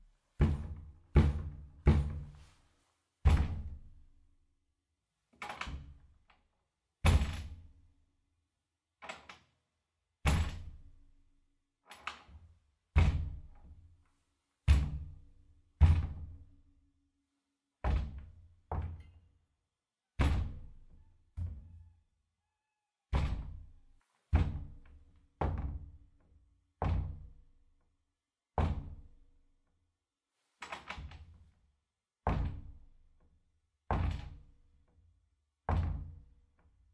Soft door banging
Soft knocking on a big wooden door, some noise fom the metal lock. Recorded with a Zoom H2n.
knock, lock, unlock, slam, closing, door, wood, close, bang, shut, zoom, H2n, wooden